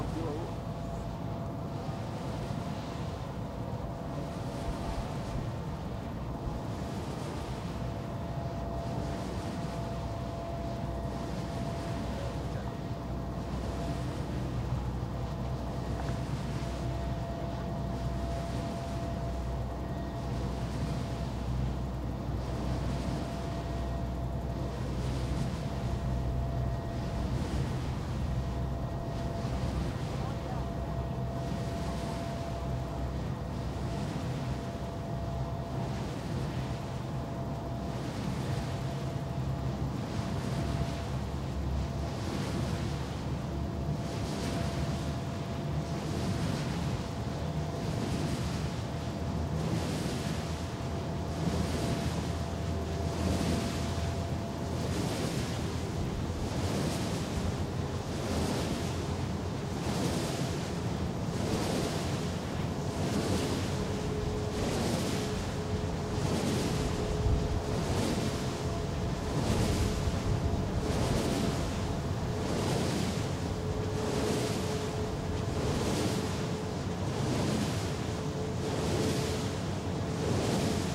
Field recording of a wind turbine in the terra alta region of Spain. Microphone located at the base of the turbine.
recording chain - Rode NTG3 - AD261 - Zoom h4
field-recording, turbine